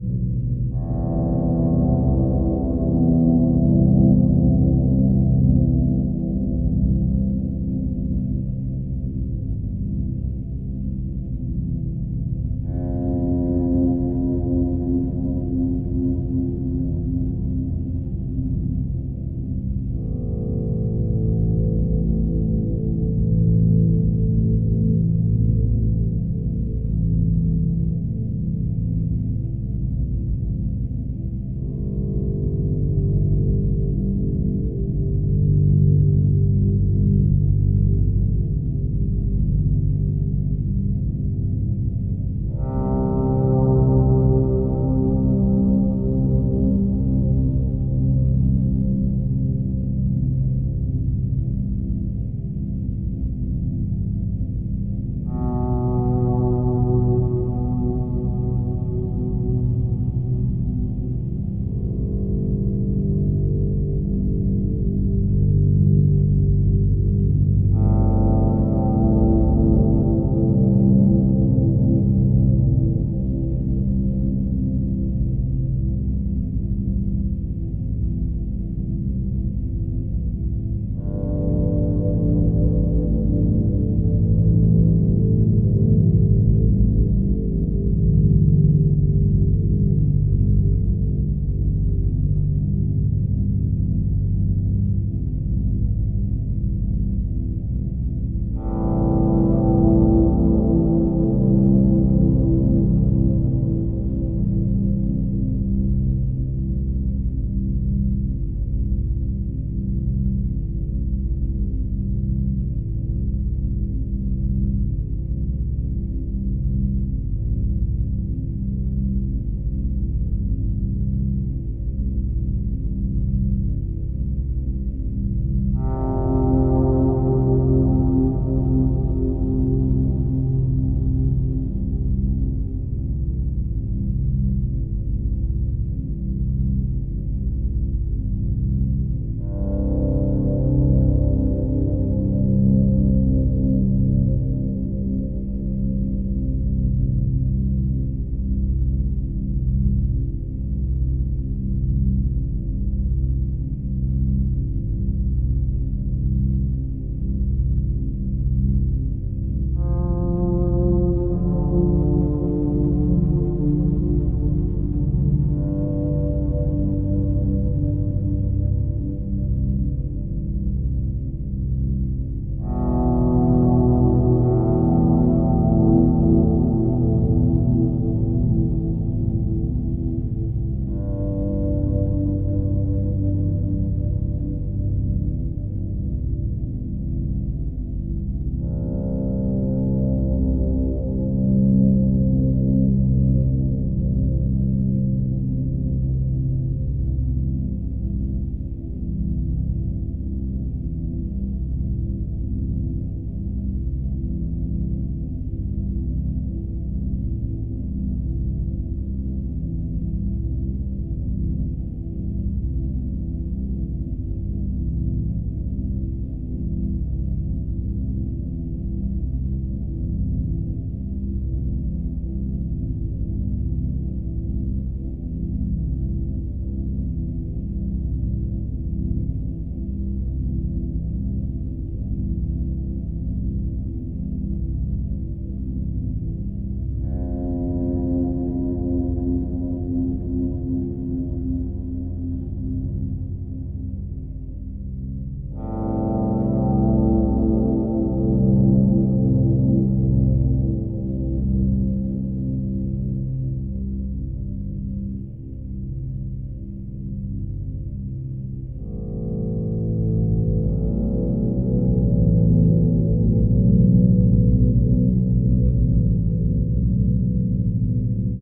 bowed guitar loop
ambient guitar loop made with Omnisphere
guitar, ambient, pad